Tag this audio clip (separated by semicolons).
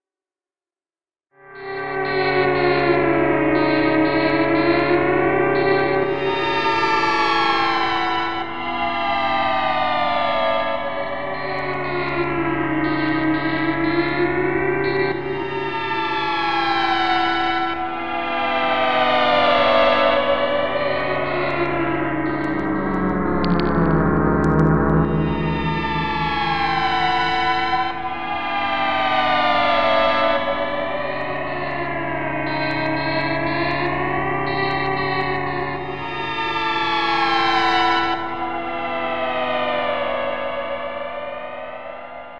ambient; analog; electronic; scary